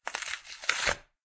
Ripping Cardboard Satisfying
One of the ripping sounds I recorded while disassembling some cardboard boxes. This one sounds very satisfying, imo. Very raw, just cleaned up in audacity.
box, cardboard, paper, rip, ripping, tear, tearing